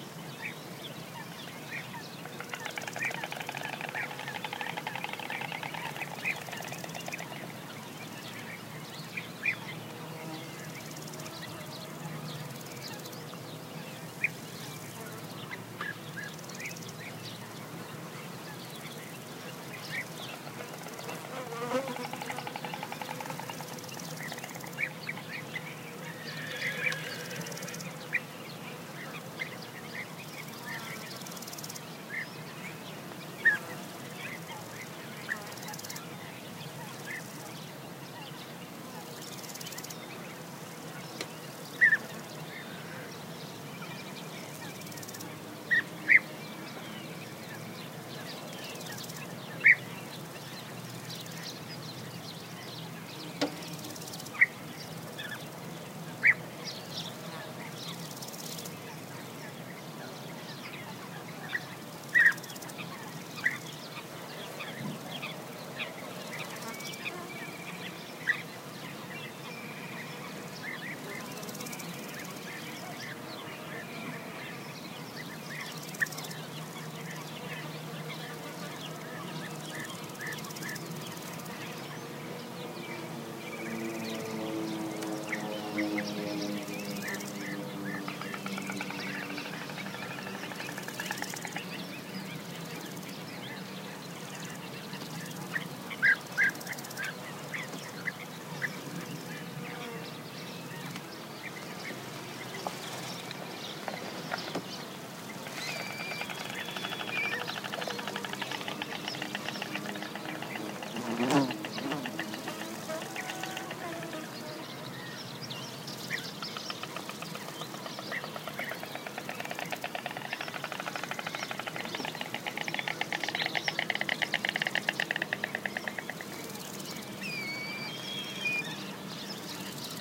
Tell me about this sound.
20060425.dehesa.ambiance
ambiance in an open, savannah-like oak woodland (Spanish Dehesa) during spring. Lots of insects and birds (stork, kite, beeaters, etc), and of course an airplane. Recorded near Dehesa de Abajo, Puebla del Río, Sevilla. Sennheiser ME62 > iRiver H120 / ambiente de una dehesa en primavera.